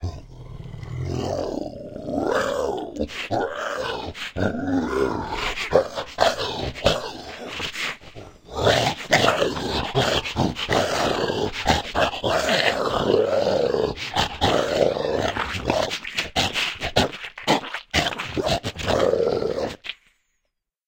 werewolf attack 2
The terrible attack of a giant werewolf, really scary and insane, monstrous voice. This is a effect I created for my horror short film Fera Mara.